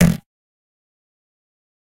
some processed drum